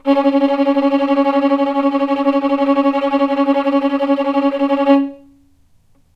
violin tremolo C#3
violin, tremolo